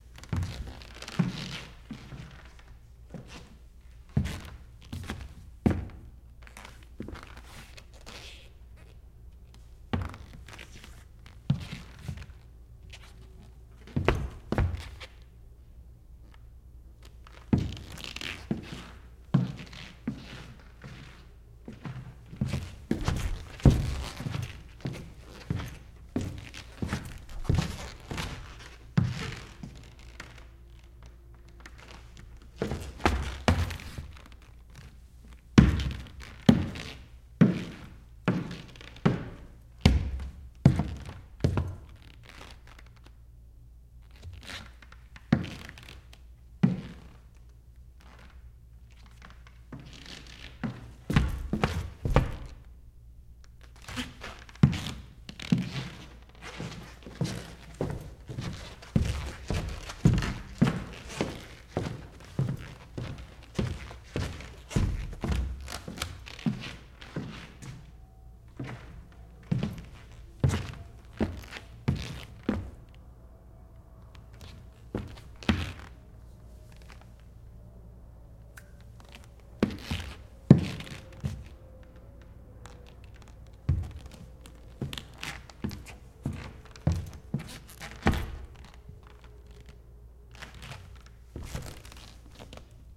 pasos en el escenario de un teatro. footsetps in the scenario of a theatre